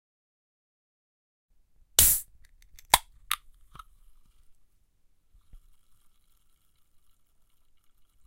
Can Open
Opening can with hand
open, soda, can, drink